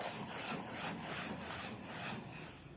spin sound
rolling, spins, spin, roulette, roll, wheel